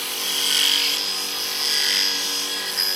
Belt grinder - Arboga - Grinding steel light
Arboga belt grinder used to grind steel with a light touch.